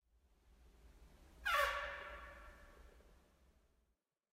Sneaky Slight Fart
Real farts with some natural reverb. Recorded with a fucked up iPhone 7 in a disgusting screwed up pub. As always I was dead drunk and farted away on the lovely toilets there.